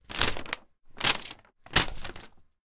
golpear dos folios entre si
beat, between, paper